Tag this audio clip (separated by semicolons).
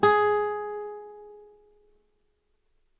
g; note; nylon; music; string